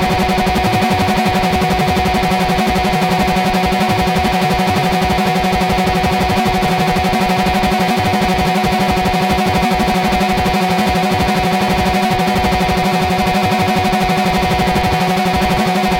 Sub-sequence 001
This sound is a processed monotron beep.
The headphones output from the monotron was fed into the mic input on my laptop soundcard. The sound was frequency split with the lower frequencies triggering a Tracker (free VST effect from mda @ smartelectronix, tuned as a suboscillator).
The higher frequencies were fed to Saro (a free VST amp sim by antti @ smartelectronix).
Some of the sounds produced were unstable. Like this one where the sub-oscillator seems to be playing a little crazzy sequence. Sounds like a circuit-bent thingy.